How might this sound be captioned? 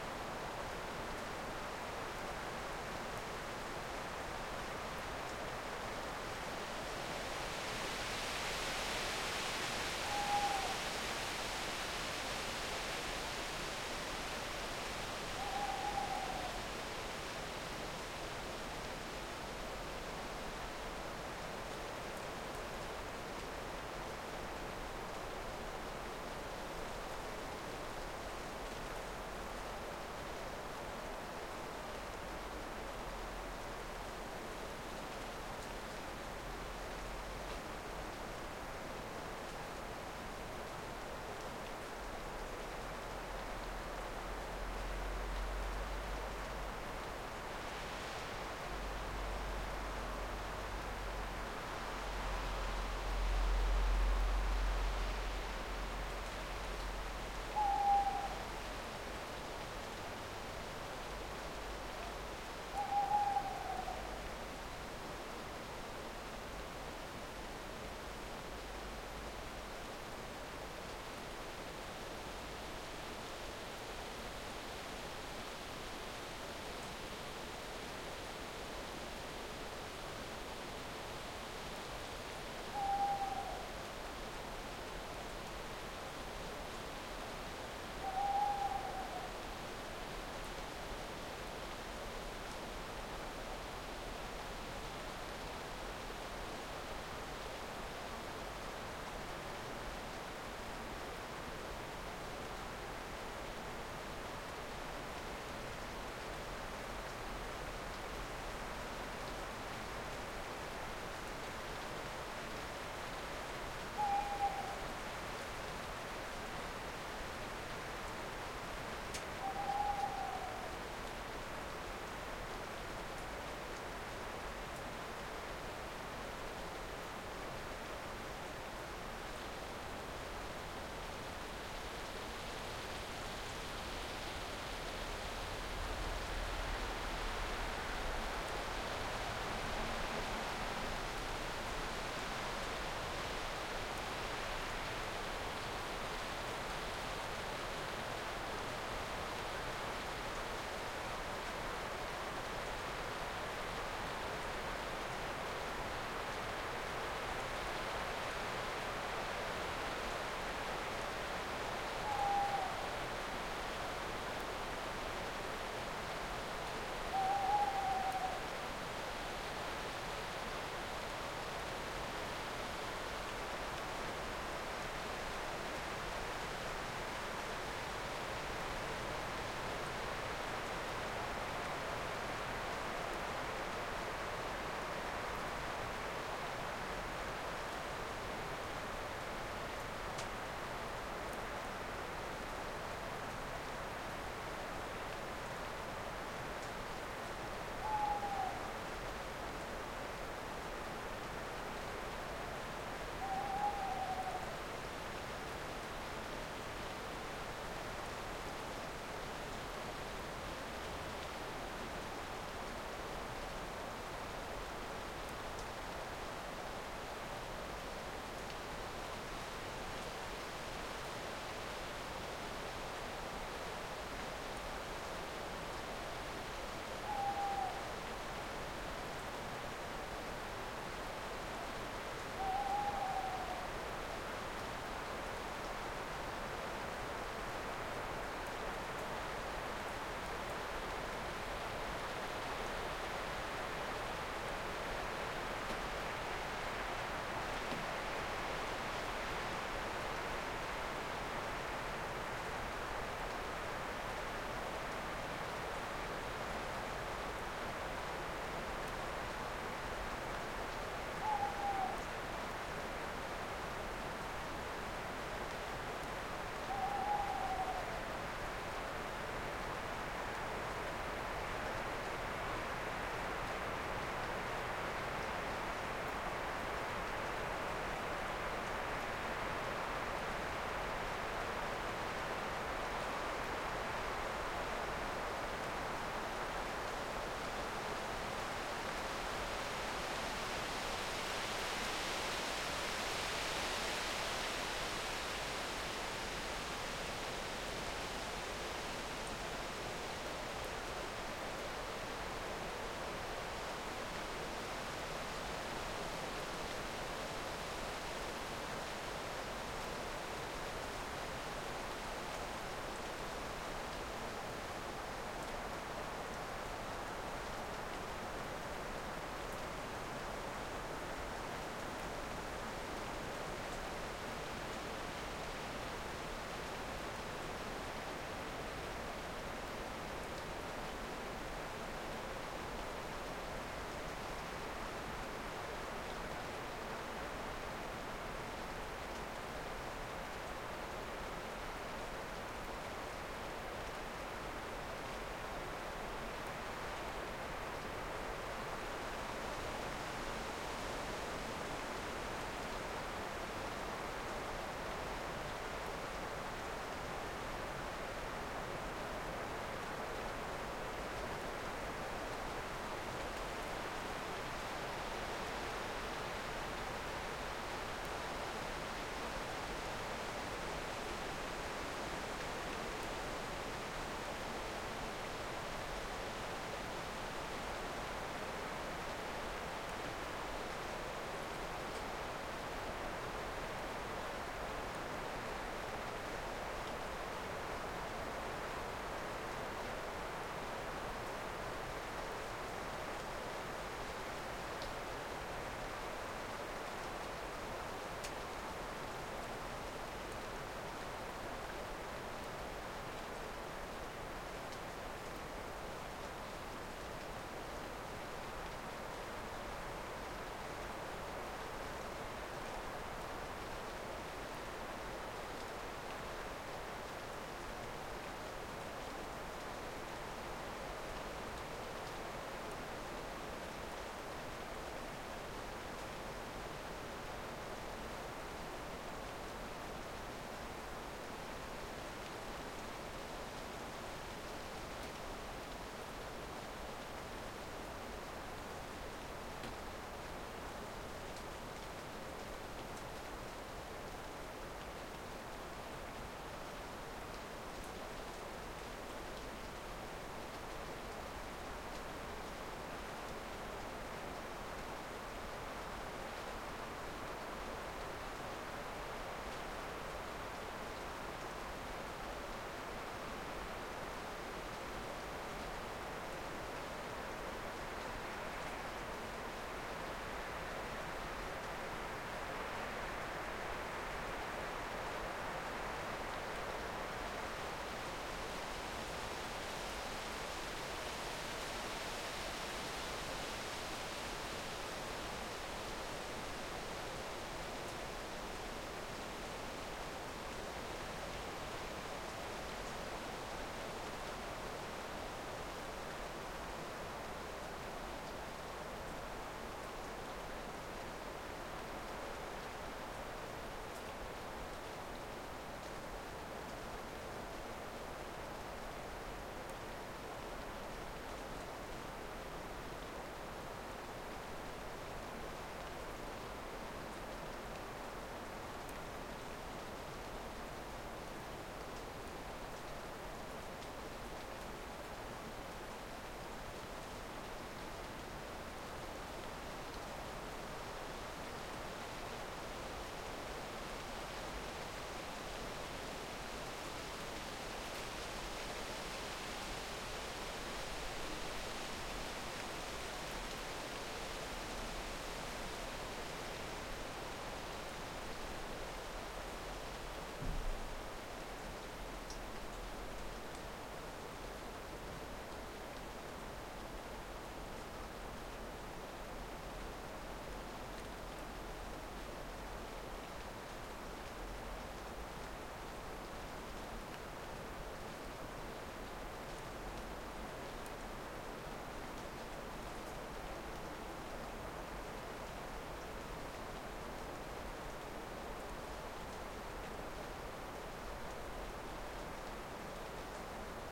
Just a few minutes of a strong wind in the autumn in the evening. A tawny owl can be heard, too. Recorded in November 2009 in Scotland, AT825ST microphone, Rycote windshield into FR-2LE from Oade.
owl, breeze, field-recording, storm, wind